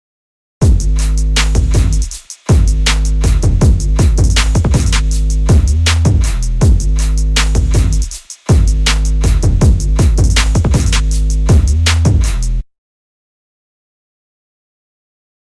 Hip-Hop Beat 7
A cool sounding loop of hip-hop drums. This sound was created with Groovepad.
bass, beat, beatbox, dance, deep, drum, drum-kit, drum-loop, drums, funk, funky, groove, groovy, hip-hop, hiphop, loop, loops, low, music, percussion, percussion-loop, rap, rhythm, rythm, song, trap, trap-loop, trip-hop